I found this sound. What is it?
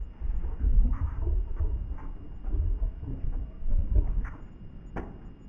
Dark Language
Suspense, Orchestral, Thriller